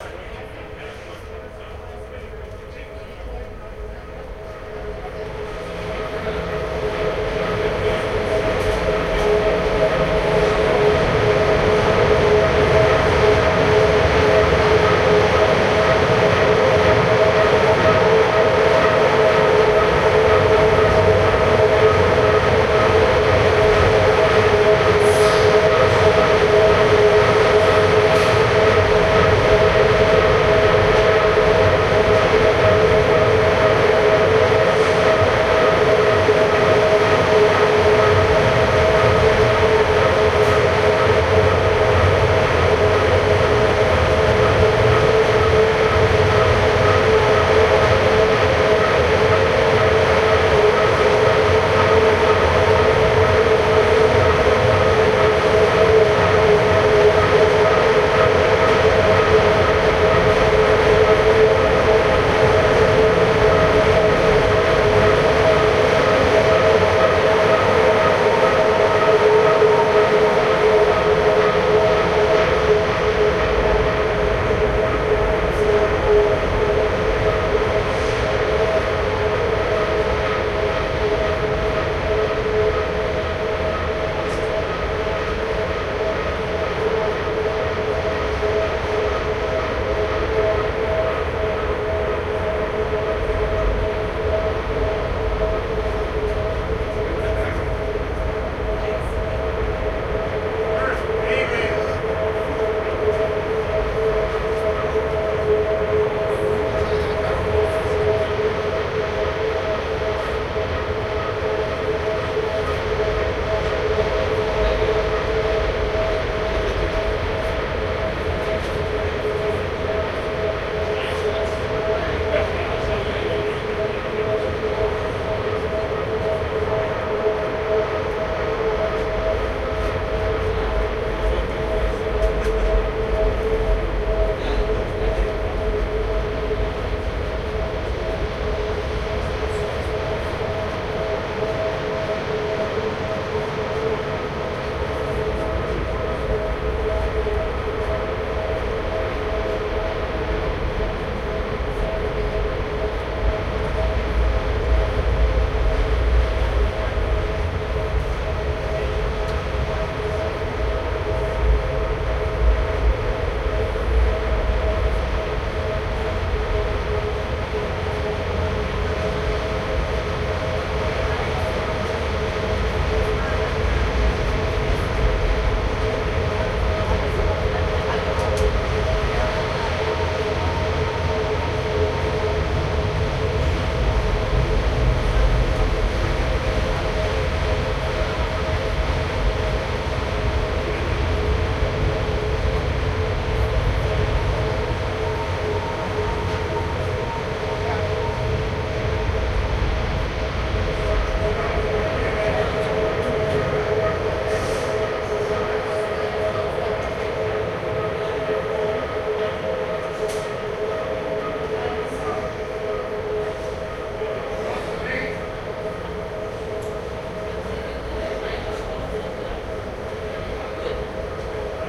Cruiseship - inside, crew area near the engine (loud engine sound, crew talking in the distance). No background music, no distinguishable voices. Recorded with artificial head microphones using a SLR camera.